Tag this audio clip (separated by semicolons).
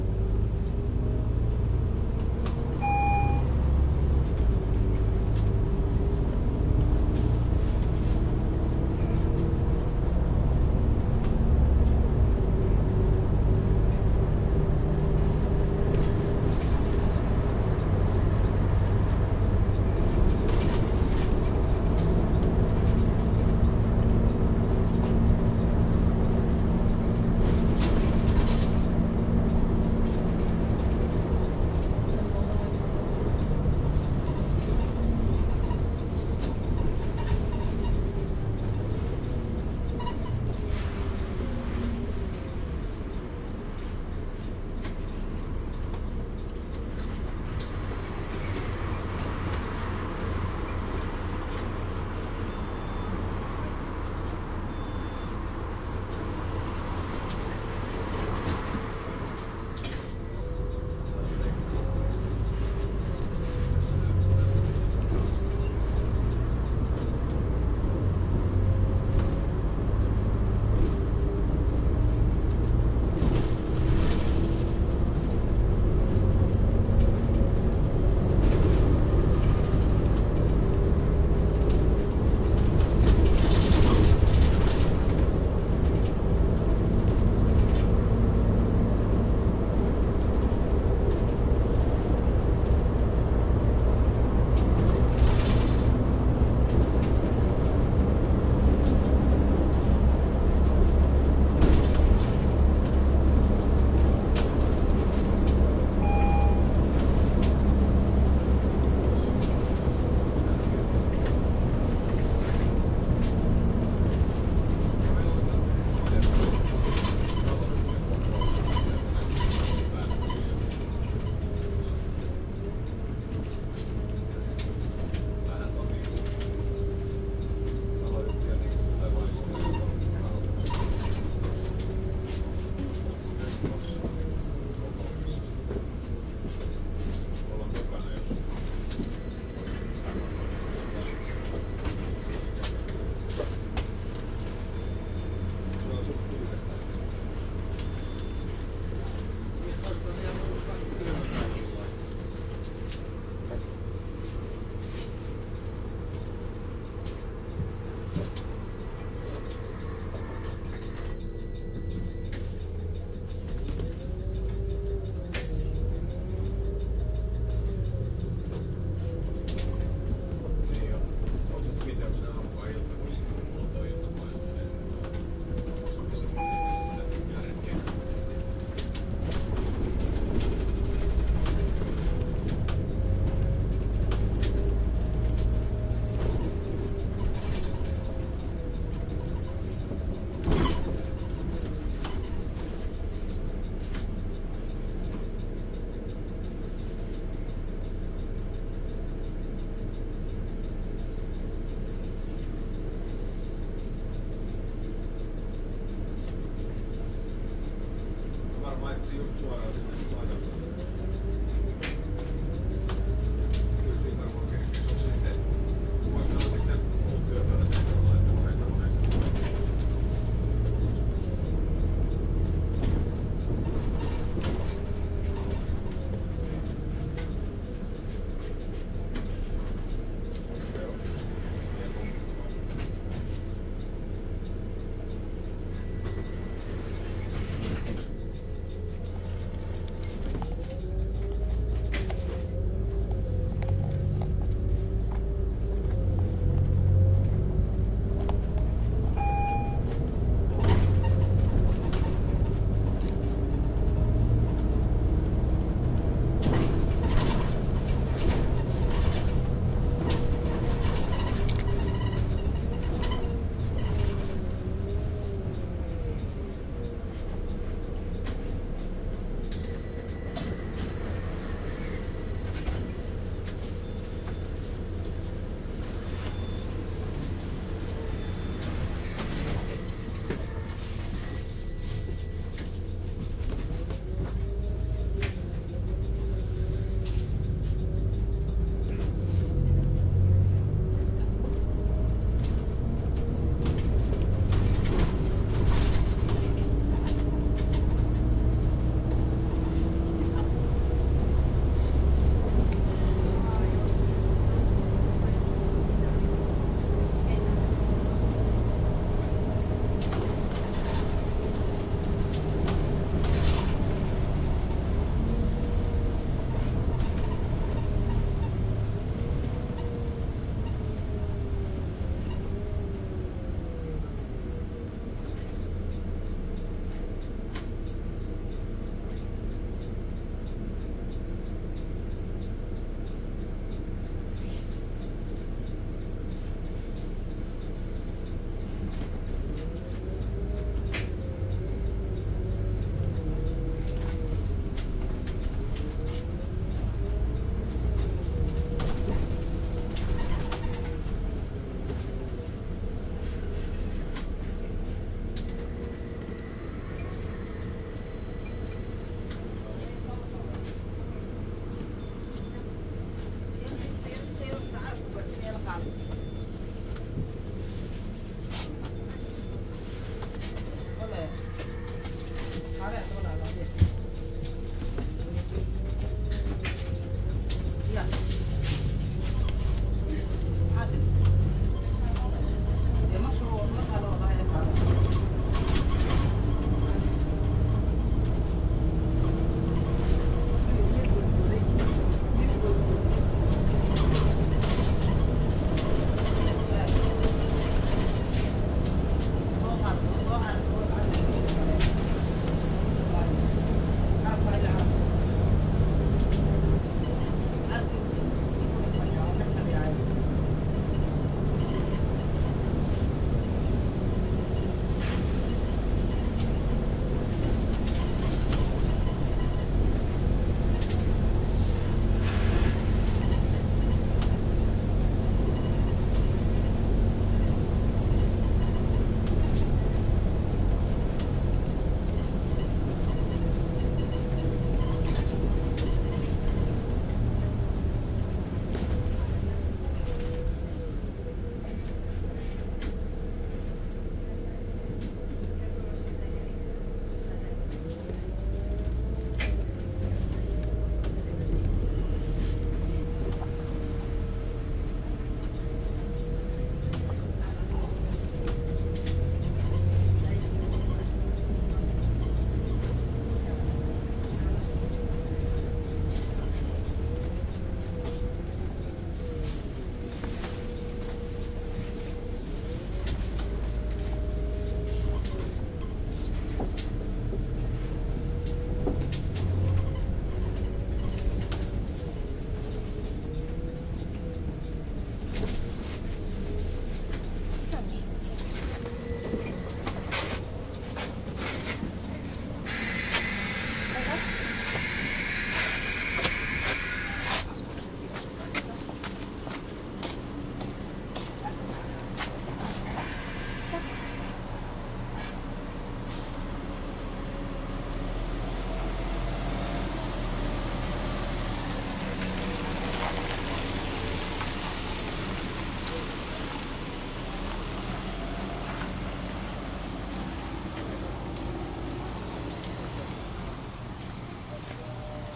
bus; car; driving; field-recording; interior; location-Helsinki-Finland; passengers; people